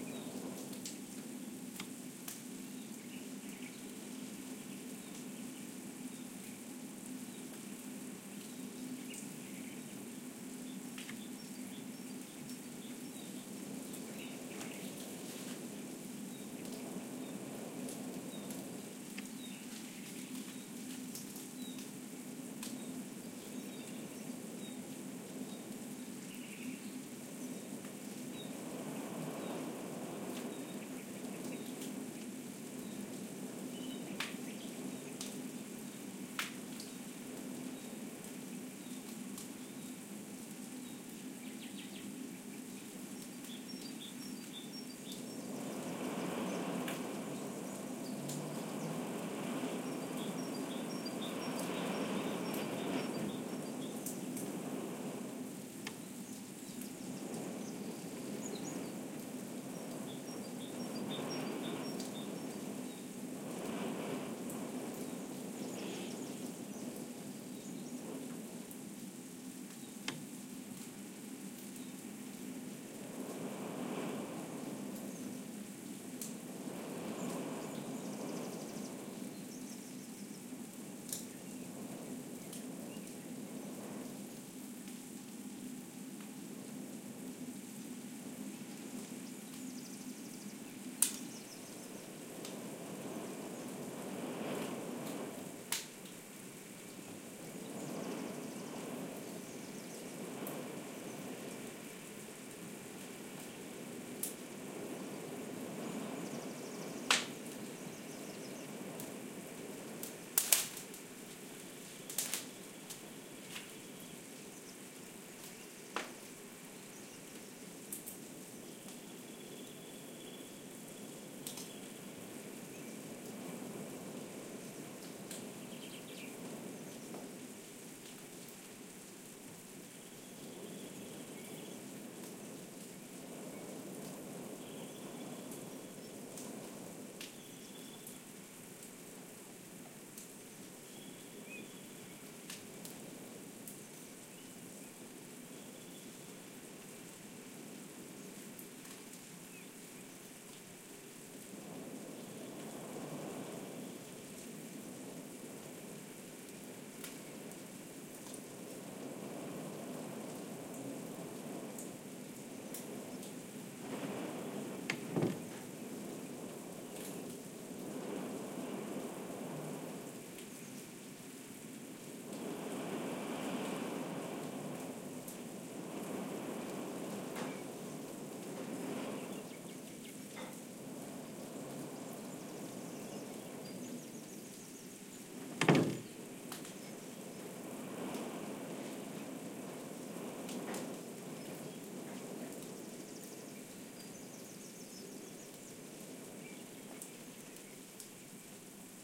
20160414 room.with.fireplace.21
Winter and spring live in this sample: the noises produced inside (fireplace, wind on windows) contrast with those coming from the outside (bird callings), a weird mix. Audiotechnica BP4025, Shure FP24 preamp, PCM-M10 recorder. Recorded near La Macera (Valencia de Alcantara, Caceres, Spain)